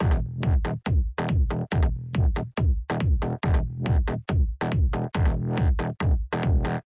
here is a nice Loop for every electric or Goa or trance song. i´ll try my best! thank you and i hope you like it!